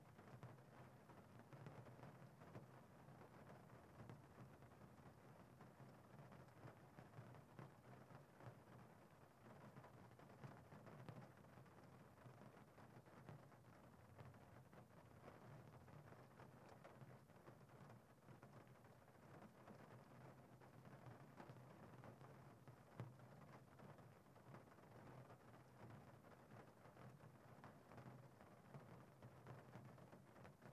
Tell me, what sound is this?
Car Internal Gentle Rain On Hood
Gentle rain pouring on the hood of a car recorded from the inside, with NTG-3.
Rain, Water, Raindrops, Hood, Car